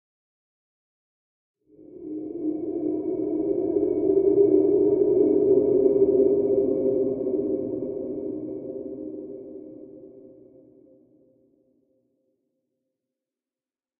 Artillery Drone Burnt Orange
Second set of 4 drones created by convoluting an artillery gunshot with some weird impulse responses.
A shorter drone pulse, very ambient.
Soundscape, Ambient